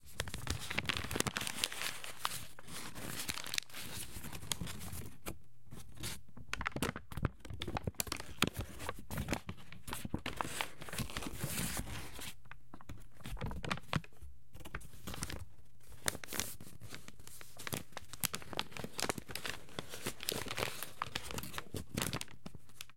Rumpling Paper 03
Someone rumpling paper.
Paper, Rumpling, Rumple